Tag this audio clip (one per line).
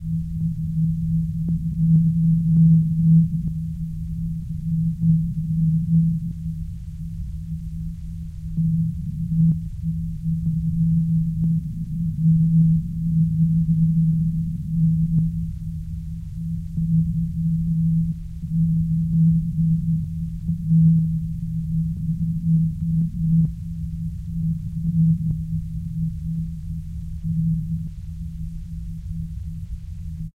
depressing
cliff
cosmos
vacuum
wait
low-gravity
stinking
space